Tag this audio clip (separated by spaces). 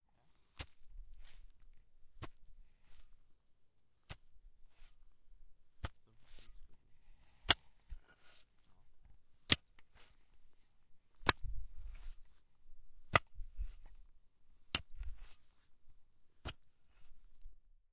CZ Czech Pansk Panska showel